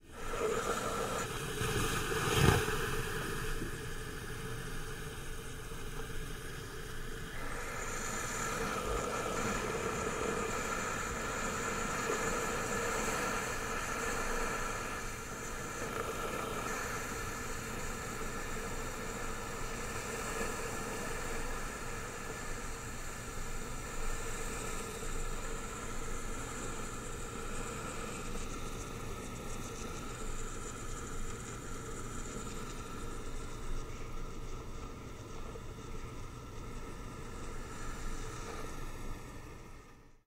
Coffeemaker-harsh-hiss
sound of steam from coffee maker after brew. Harsher hiss
coffee, coffeemaker, hiss, steam